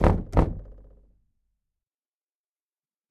Door Knock - 41
Knocking, tapping, and hitting closed wooden door. Recorded on Zoom ZH1, denoised with iZotope RX.